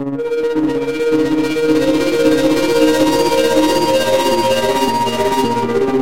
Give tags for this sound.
effect
fx
guitare
indus

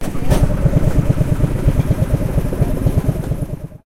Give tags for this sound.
motor
vehicle
go-cart
engine
crank

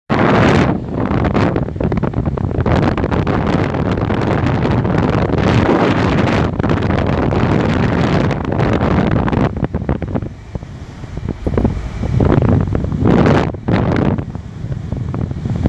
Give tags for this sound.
heat
heater
space-heater
vent

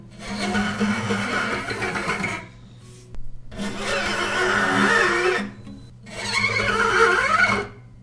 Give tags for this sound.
scrape
nails
scratching
fingers
grind
scratch
peel
rub

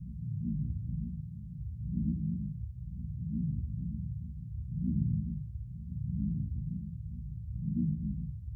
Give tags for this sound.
Hum
Power
Machine
Synthetic
Machinery
Sci-Fi

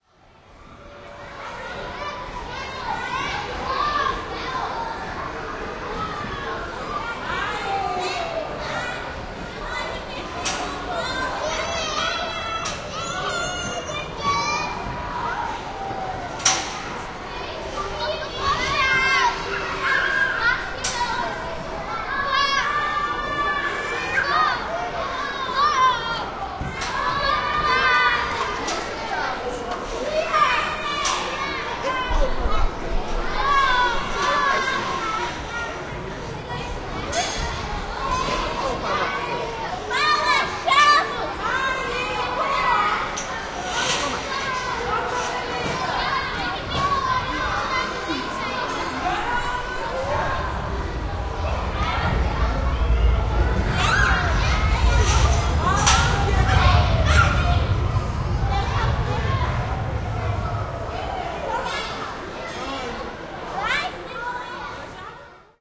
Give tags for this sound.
amsterdam
field-recording
tunfun
interior
dutch
children

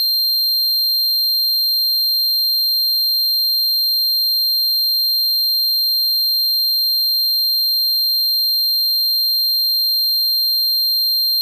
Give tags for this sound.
A-100
A-110-1
analog
analogue
electronic
Eurorack
modular
multi-sample
oscillator
raw
rectangle
sample
square
square-wave
synthesizer
VCO
wave
waveform

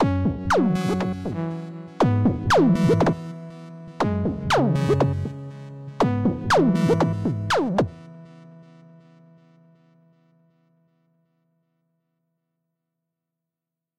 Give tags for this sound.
Reason
Sequence
Synth

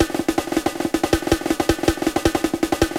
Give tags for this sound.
breakbeat
drums
programmed

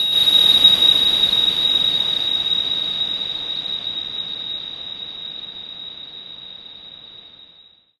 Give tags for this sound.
blow
industrial
reaktor
ambient
atmosphere
multisample
pad

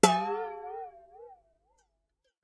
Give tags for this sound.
golpe
ondulating
percussive
hit
frecuencies
percussion
metal
metallic

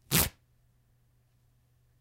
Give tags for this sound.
flesh
rip
tear

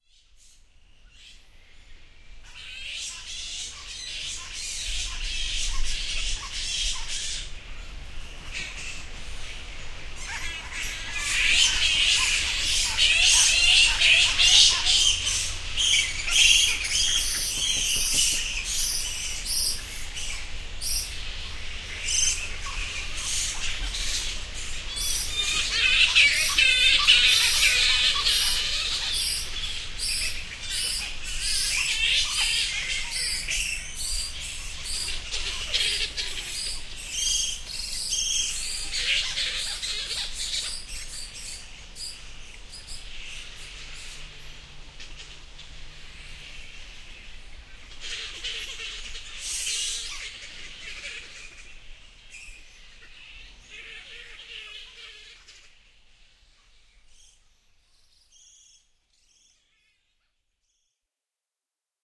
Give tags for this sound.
australia
bat-colony
bats
bellingen
field-recording

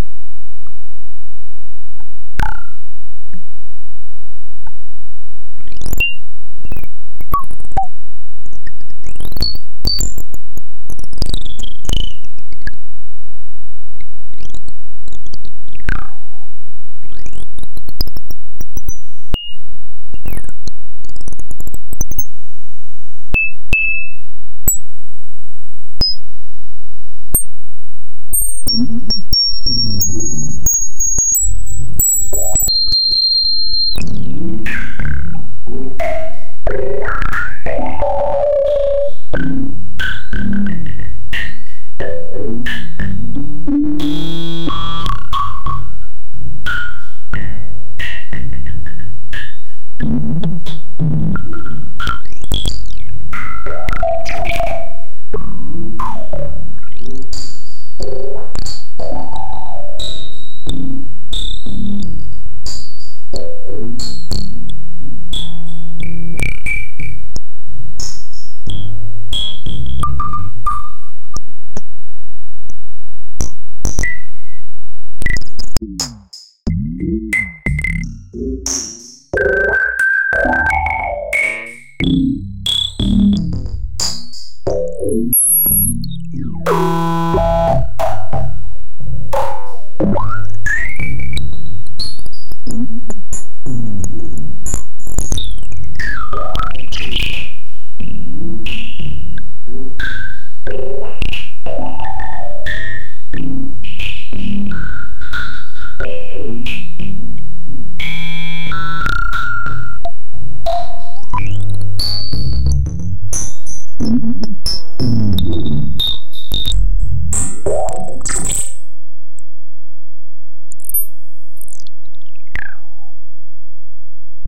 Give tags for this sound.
90-bpm
hip-hop

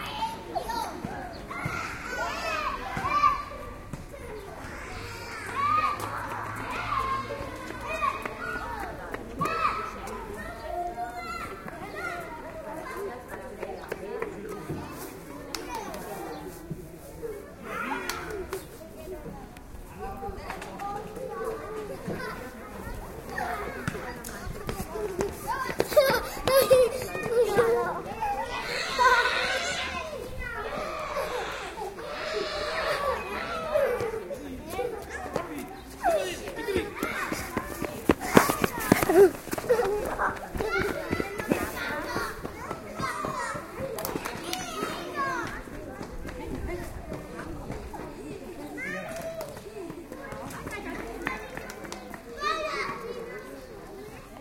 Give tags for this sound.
play
spring
laugh
kids
park

